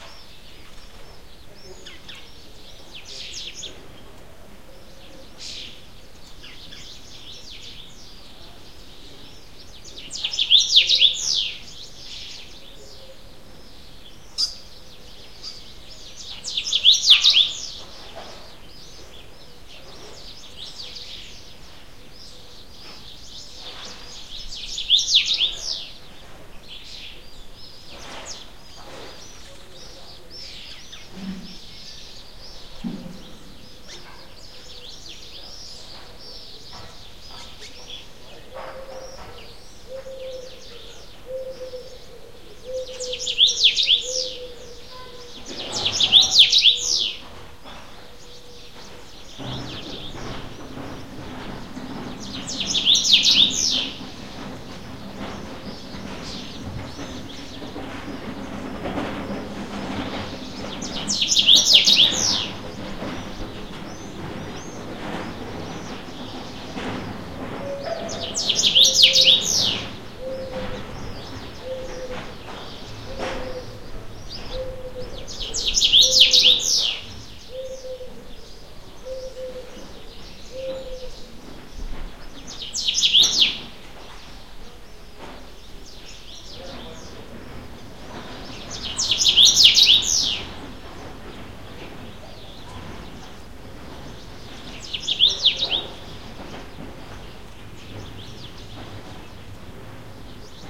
early morning riad
Early morning birdsong and other background noises, recorded on a Riad rooftop in Marrakech, Morocco.